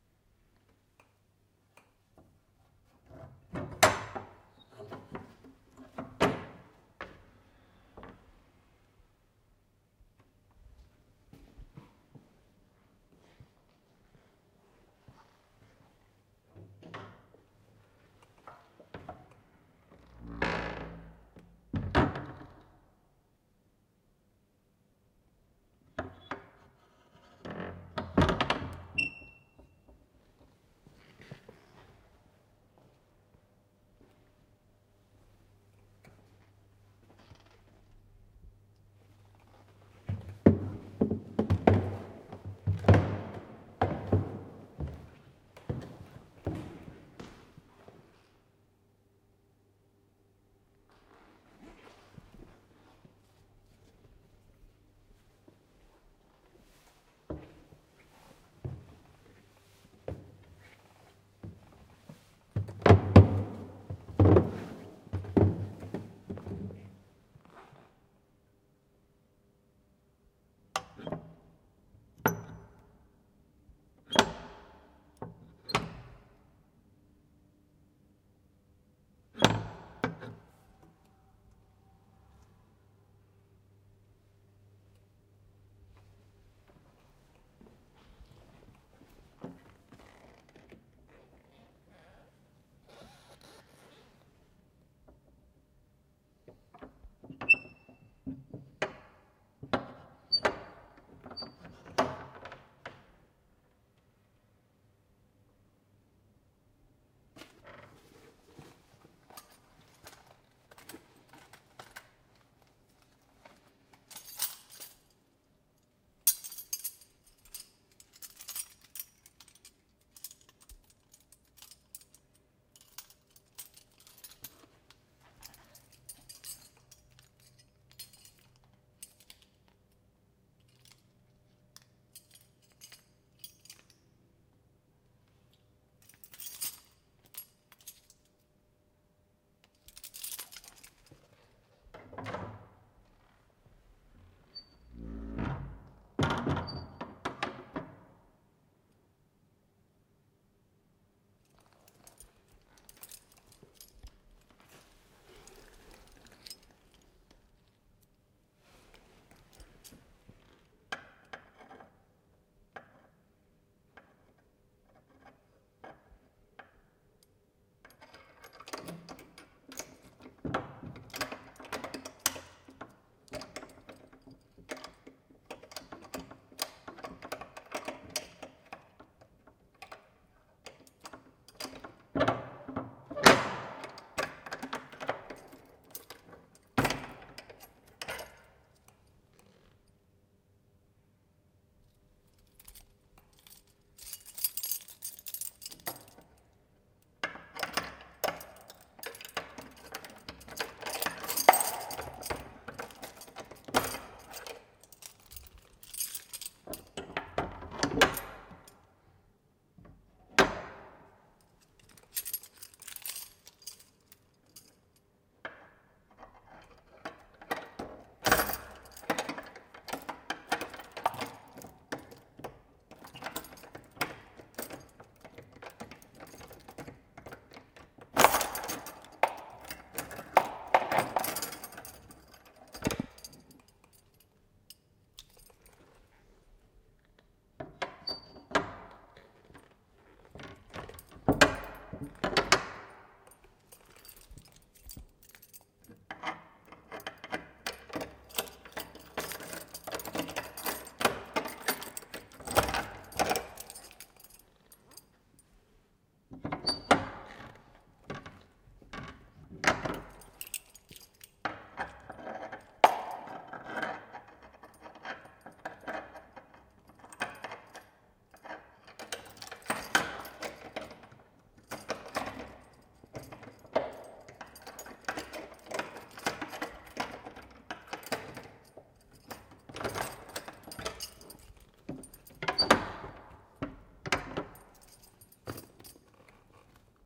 Abbey Walk 1
Up and down creaking stairs and struggling to open an old wooden door with large, carved keys. Lots of natural acoustics and creaks.
Noirlac-Abbey, footsteps